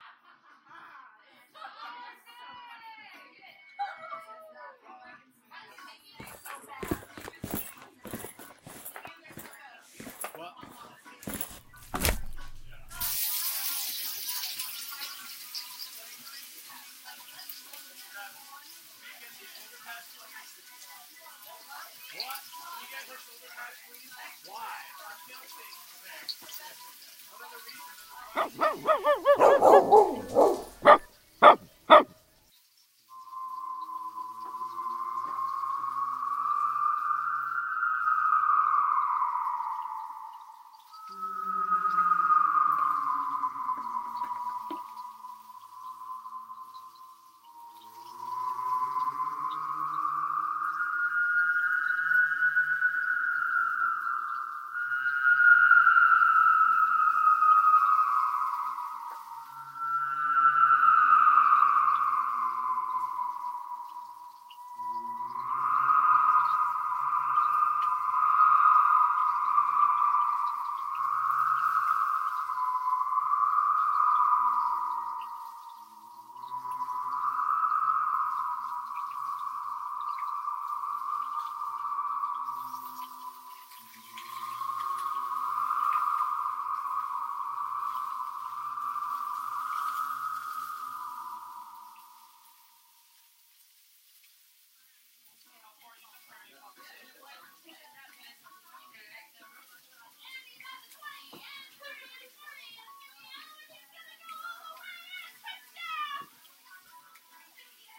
Barbecue Out Back
A friendly barbecue becomes an historic occasion. Thanks Eneasz for the sound of wet meat:
And Celticvalkyria for the sound of boots walking!:
grill, alien, sci-fi